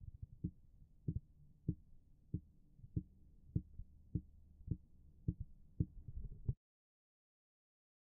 running hard ground
footsteps running on hard ground